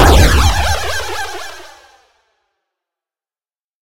Laser FX #2
Laser effect made in LabChirp.
movie, noise, future, shot, electronic, game-asset, delay, sound-design, effect, gun, laser, fiction, star-wars, fire, digital, weapon, sci-fi, series, gunshot, shoot, game, space, reverb, star-trek, sfx, sound-effect